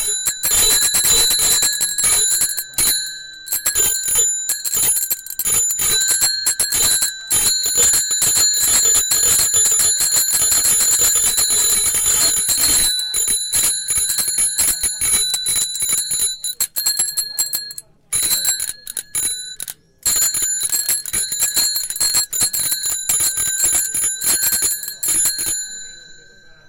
nervous bell
bell, bicycle, bike, cycle, horn, mechanic, metallic